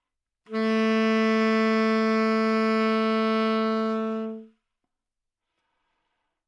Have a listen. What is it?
Sax Tenor - A3
Part of the Good-sounds dataset of monophonic instrumental sounds.
instrument::sax_tenor
note::A
octave::3
midi note::45
good-sounds-id::4978
A3, good-sounds, multisample, neumann-U87, sax, single-note, tenor